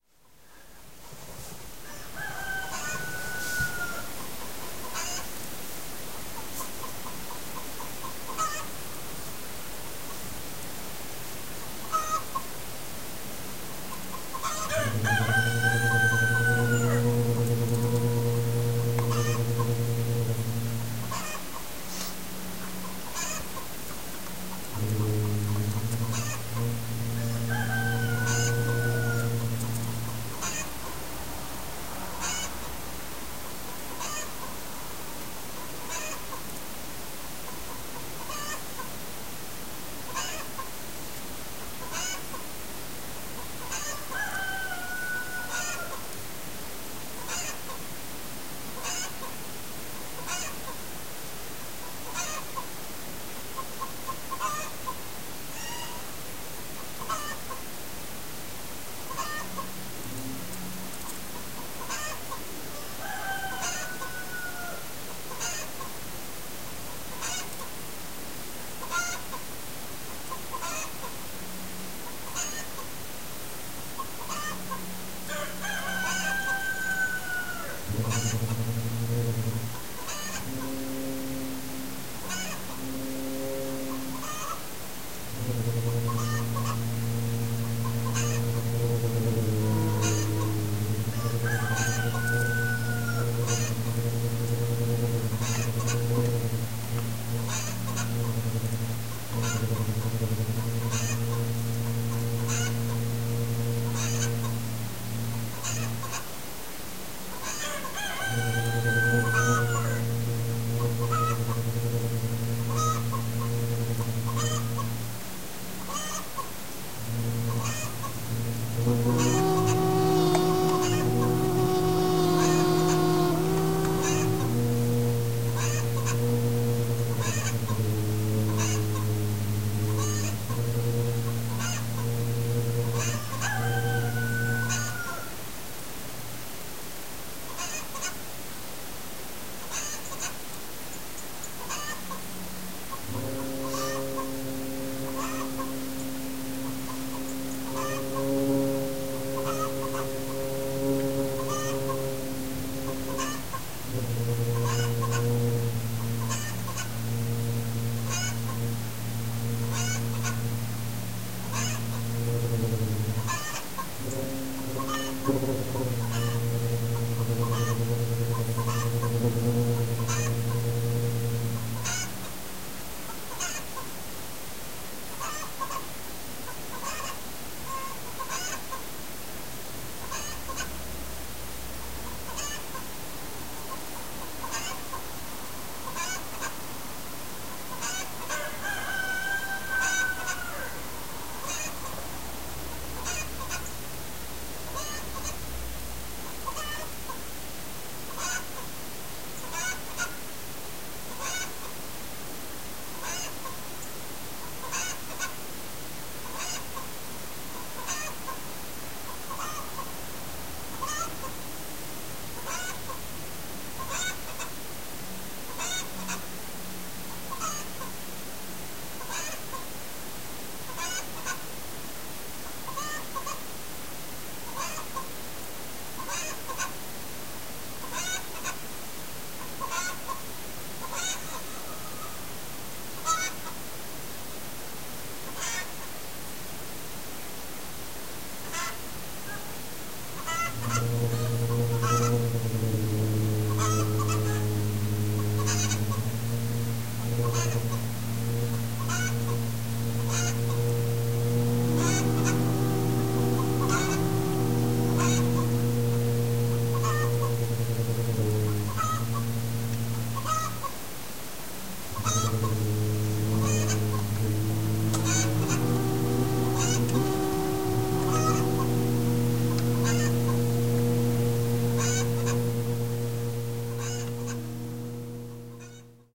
in the background
hens and roosters
in the foreground
hiss of the sirocco
through the slit in an old window